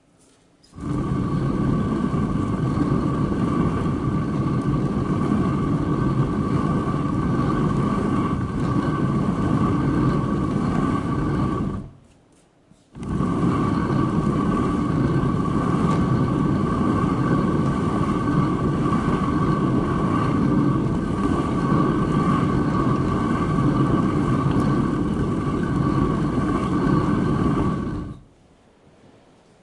sound of rotary quern grinding bere-meal